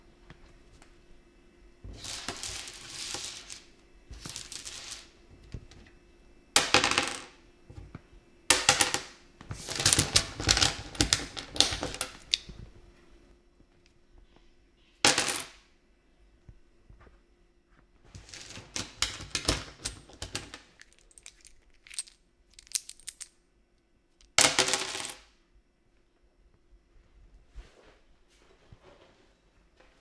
Small plastic objects including scrabble tiles) dropped on table and slid along it.